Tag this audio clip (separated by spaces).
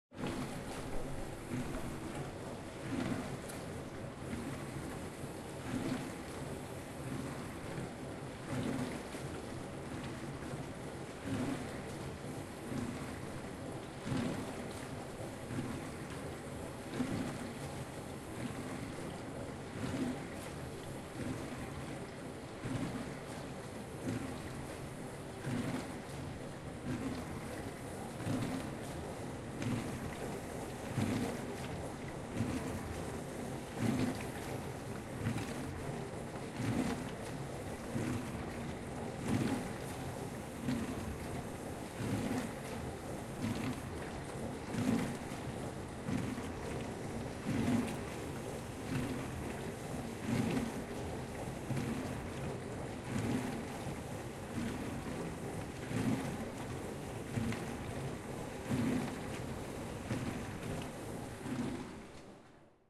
Ambience Appliance Dishwasher Dishwashing-Machine Hum Kitchen Machine